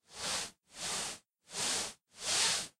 dirty dragging sound. it has a rugged texture to it
pull, grinding